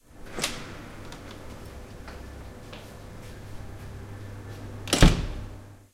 Door (open and close)
A door opening and closing
campus-upf, open